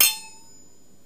Dings with a pot of water
water, bright, ring, metal, gong